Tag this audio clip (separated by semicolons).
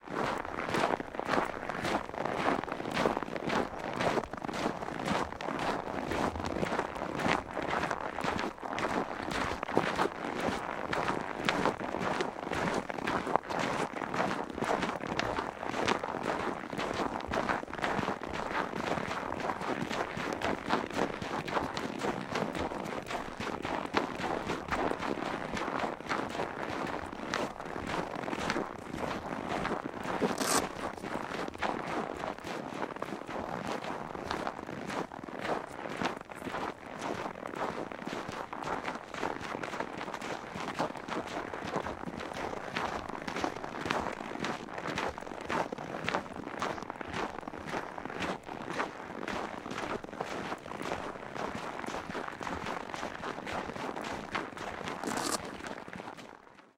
close; crunchy; footstep; snow; walk